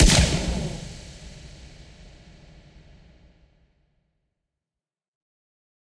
Plasma - Lazer Pistol Gun Shot 1
Sig Sauer P226 9mm gun shot altered to sound like a lazer/plasma pistol.